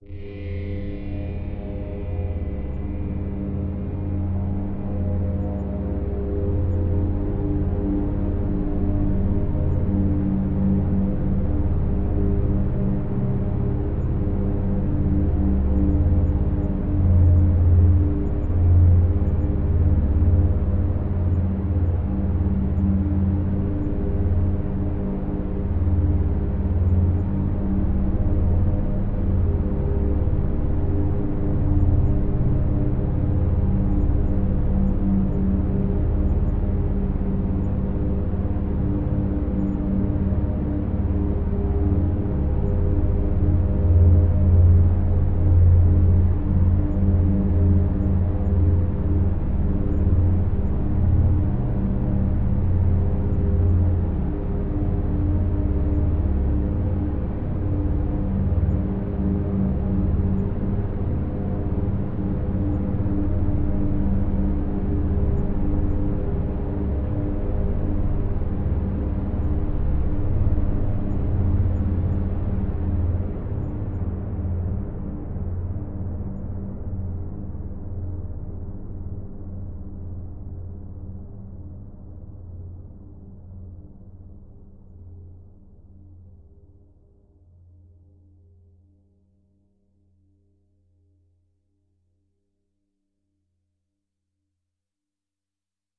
LAYERS 015 - CHOROID PADDO- (25)
LAYERS 015 - CHOROID PADDO is an extensive multisample package containing 128 samples. The numbers are equivalent to chromatic key assignment covering a complete MIDI keyboard (128 keys). The sound of CHOROID PADDO is one of a beautiful PAD. Each sample is more than minute long and is very useful as a nice PAD sound. All samples have a very long sustain phase so no looping is necessary in your favourite samples. It was created layering various VST instruments: Ironhead-Bash, Sontarium, Vember Audio's Surge, Waldorf A1 plus some convolution (Voxengo's Pristine Space is my favourite).
drone, ambient, pad, multisample